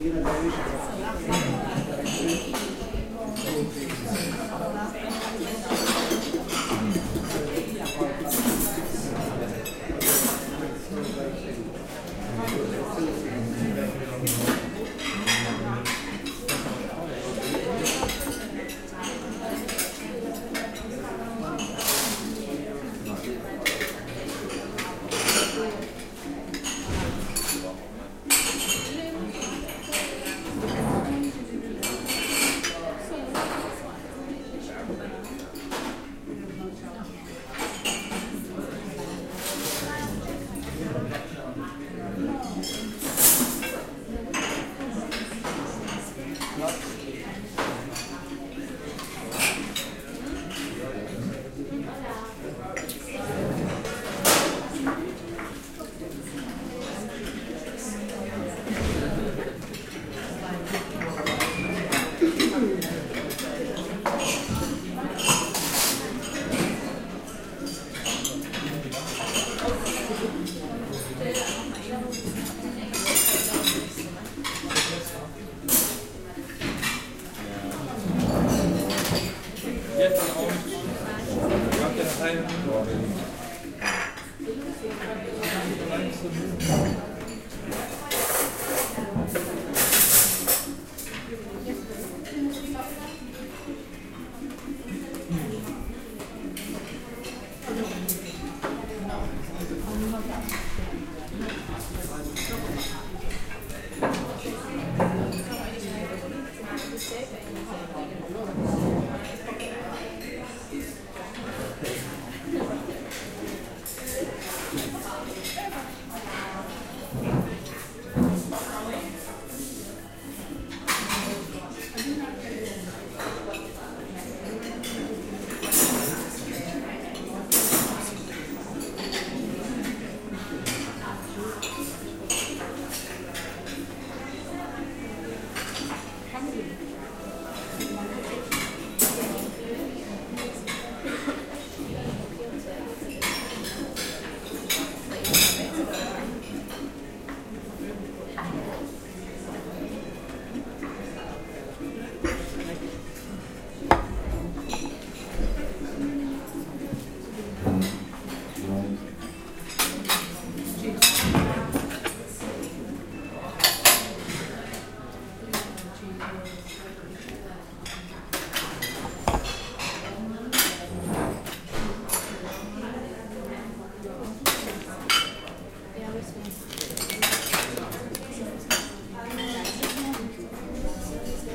AC Alicante Breakfast
Recorded with a zoom H5 over breakfast at around 9am during breakfast in the Hotel AC Alicante